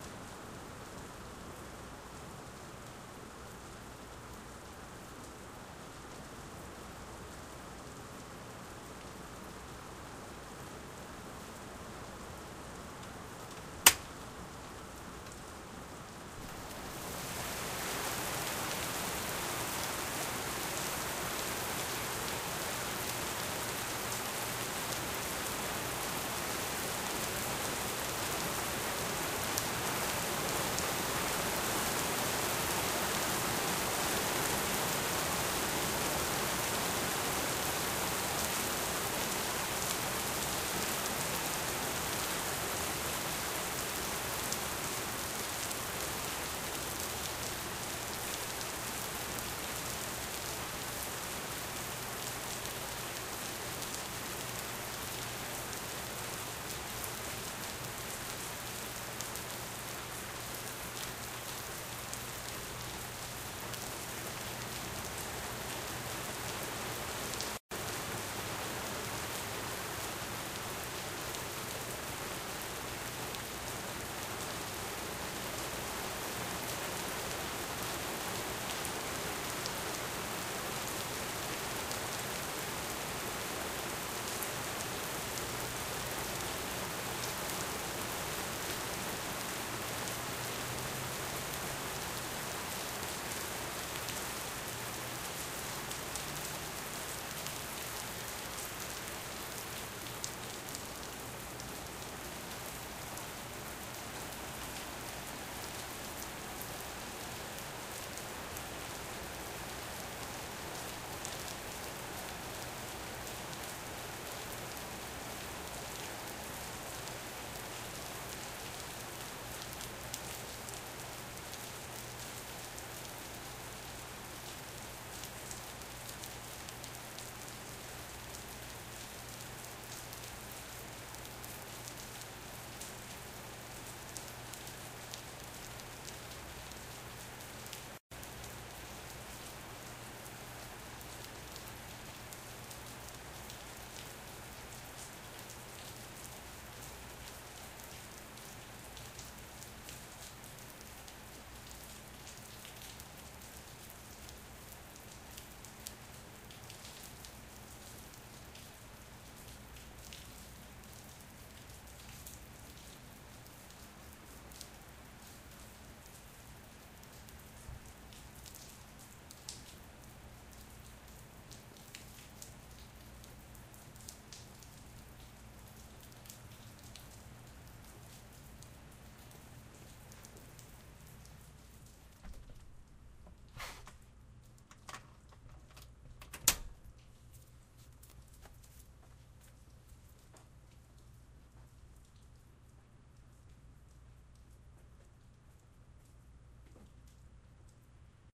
Out into the Rain then back inside
Was trying to do some audio work when a storm blew in. So I stretched the cord out the door and caught it on a whim. Enjoy!
rain, inside, outside